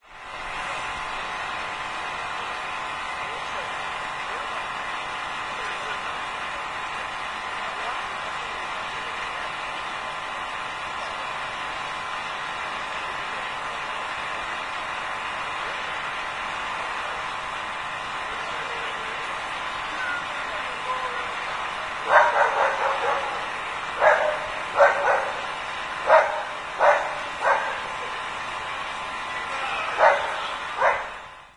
08.09.09: about 20.00; Tuesday in Sobieszów (one of the Jelenia Góra district, Lower Silesia/Poland); Ignacego Domeyki street; sounds of the fan, muffled voices of the three guys drinking and smoking on the roof;
fan, sobieszow, street, swoosh, voices